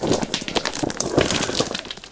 The sound of stones
stone, nature, field-recording